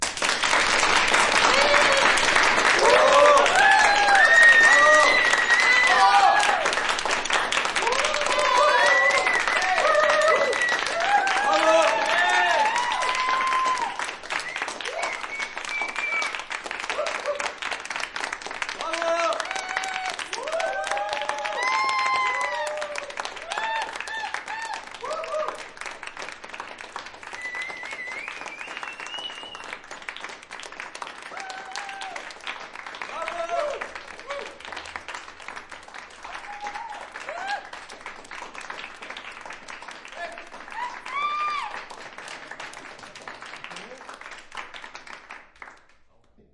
Applaus - kleines Theater 1
Applause in a small theatre
Version 1